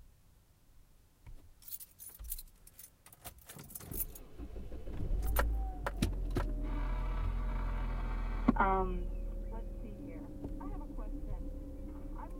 Starting up the Nissan and heading out to get me some tube recordings.
auto, car, ignition, interior